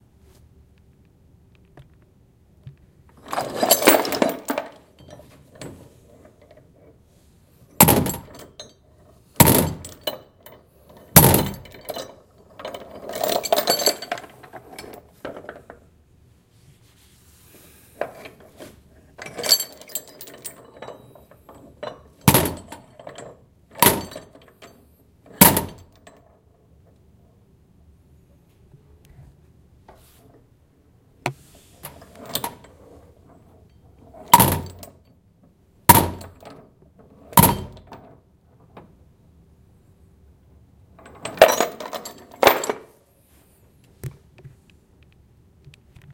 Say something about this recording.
heavy chain 211112-152243
For the 2021 version of Christmas Carol I recorded myself dragging and dropping and rattling a number of heavy chains. During the Marley Scrooge scene I would clip out segments from these recordings for the chain sounds.
carol, dropping